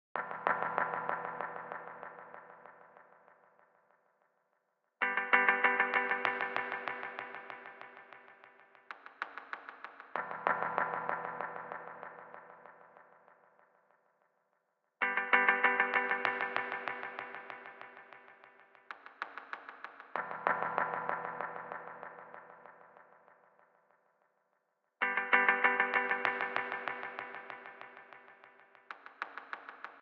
Dub Stabs E min 96Bpm
96Bpm, Deep, Dub, Techno